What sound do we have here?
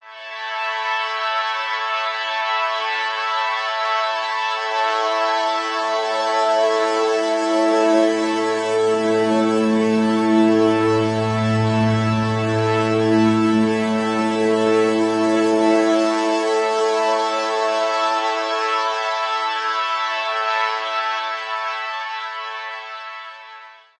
A synth texture.